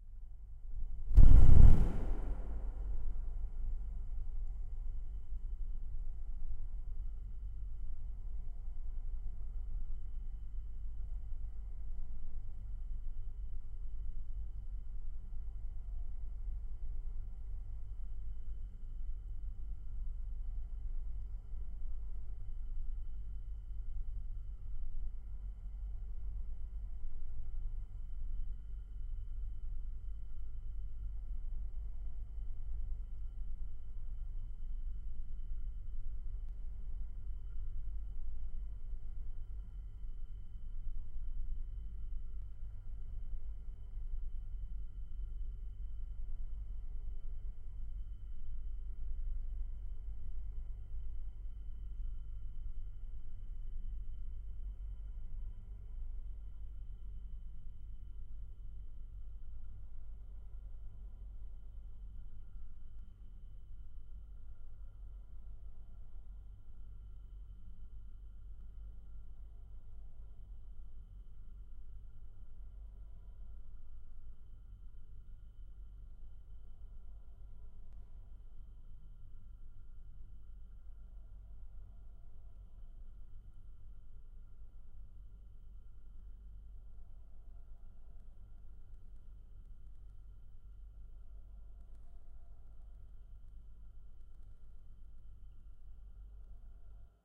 Free drone. Recorded using homemade contact microphones. The OS-XX Samples consist of different recordings of fans, fridges, espressomachines, etc. The sounds are pretty raw, I added reverb, and cut some sub. I can, on request hand out the raw recordings. Enjoy.
Ambient, Atmosphere, contact-mic, Drone, Eerie, Sound-design